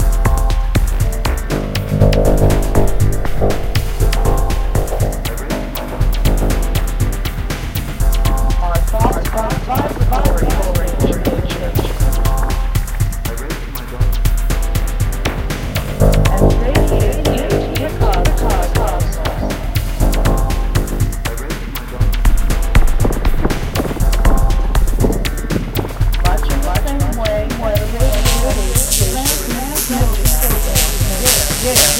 Radio Thoughts 120bpm 16 Bars
Trippy, glitchy beat with sample. Your brain is like a radio!
beats, Mixes, Trippy, Samples, Loops